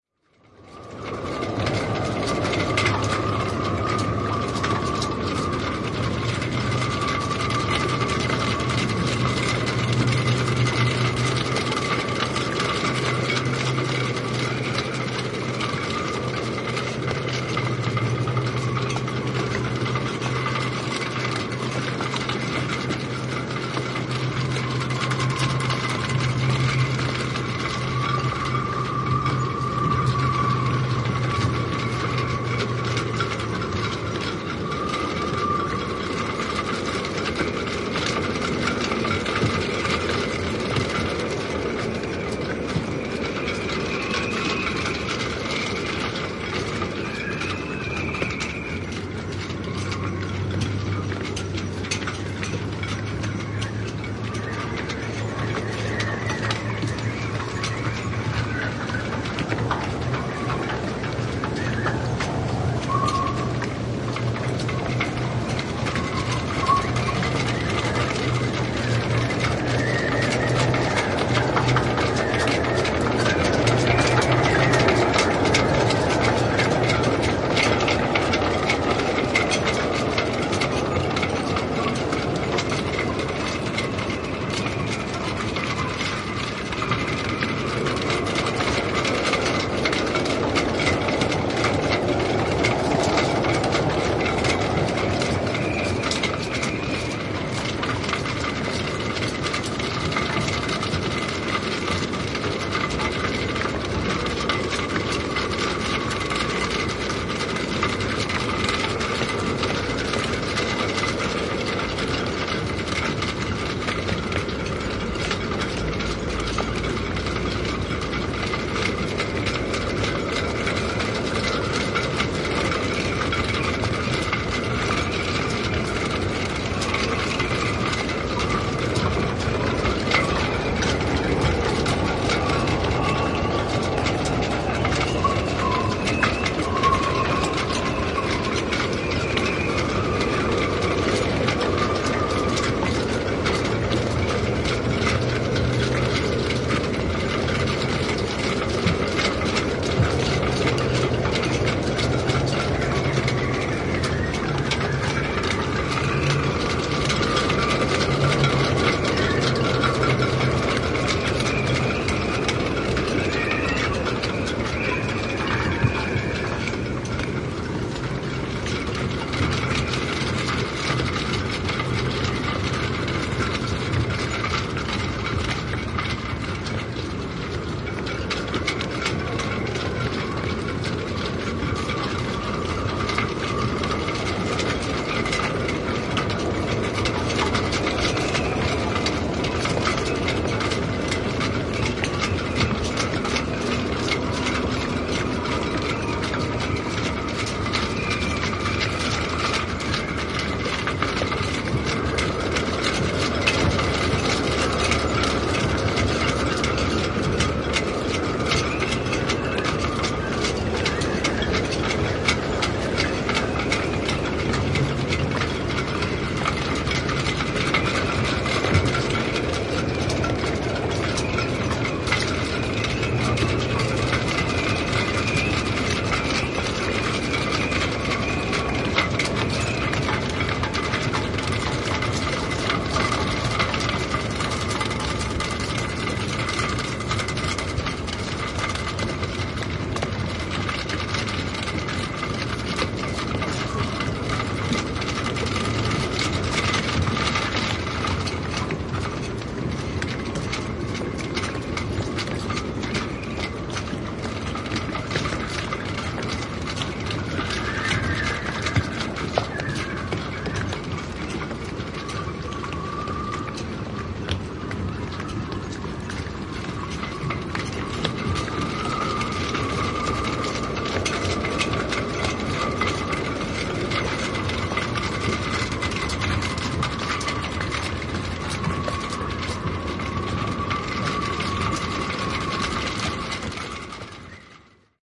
Satama, venesatama, vantit kolisevat tuulessa / Harbour, marina, shrouds clattering in the wind
Tuuli kolisuttaa purjeveneiden vantteja.
Paikka/Place: Suomi / Finland / Helsinki, Koivusaari
Aika/Date: 11.09.1987
Boating, Field-Recording, Finland, Finnish-Broadcasting-Company, Luonto, Nature, Purjeveneet, Soundfx, Suomi, Tehosteet, Veneily, Weather, Yacht, Yle, Yleisradio